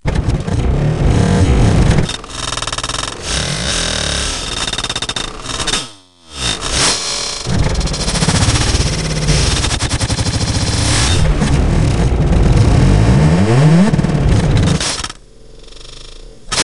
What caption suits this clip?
This is a processed waveform of an engine. I made it with fruity loops granulizer. Enjoy :)